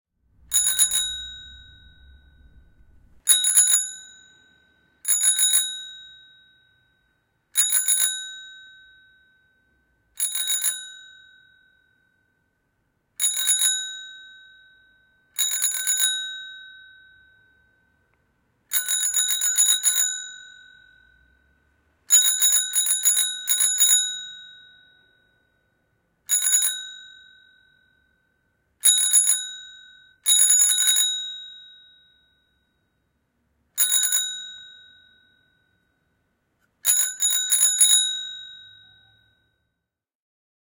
Polkupyörä, kello, pyöränkello / A bicycle, bell ringing, various rings
Kellon erilaisia soittoja lähellä. Merkinantokello.
Paikka/Place: Suomi / Finland / Vihti
Aika/Date: 23.10.1995
Soundfx, Bike, Yleisradio, Bicycle, Soitto, Cycle, Signal, Field-Recording, Suomi, Finland, Yle, Bell, Finnish-Broadcasting-Company, Ring, Bicycle-bell, Varoitus, Tehosteet, Kello